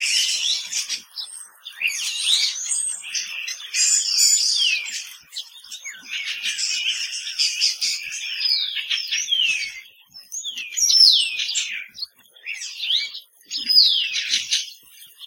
Birds Forest Nature
Birds, Nature, Forest